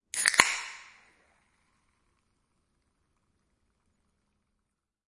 Opening Soda Can
Opening a can of soda in my garage. Slight reverb with mild fizz sound afterward.
Bubble, Can, Cola, Fizz, Metal, Open, Pop, Soda